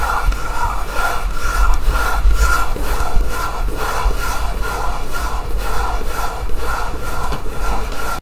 Dragging finger against wood
dragging finger against longboard deck
dragging, longboard, wood, MUS152